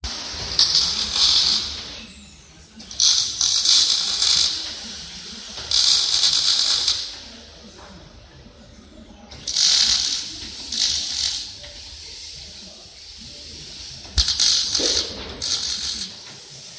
Welding (with background radio) in Titanic Quarter, Belfast
Recorded with Asustek Sound Recorder on an Asus padfone at 09.59 on 16/12/2015 in an industrial fabrication shop.
through Audacity